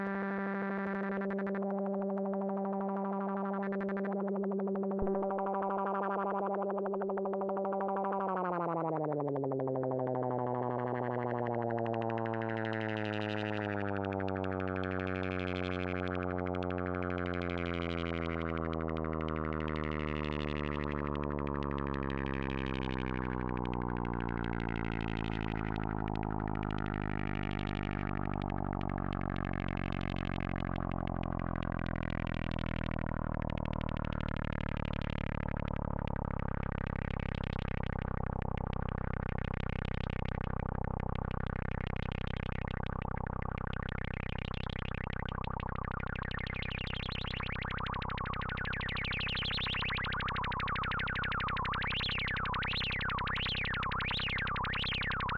A sound I generated on an M3X analog synthesizer. It is one continuous note. While it was playing I tweaked various filter and modulation parameters (I have no idea about the details). In the middle it kind of sounds like a small-engine airplane flying overhead. (You can even hear the doppler effect!)